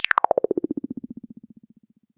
Short modulated oscillations, yet another variation. A computer processing unknown operations. Shut down.Created with a simple Nord Modular patch.
modular, sound-design, effect, robot, synthesis, synth, fm, beep, nord, bleep, modulation, digital, blip